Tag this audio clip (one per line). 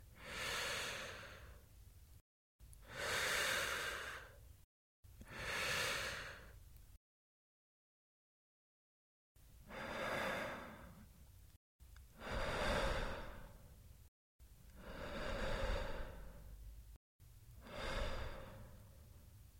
slow-breath
breathe-in
human
breathe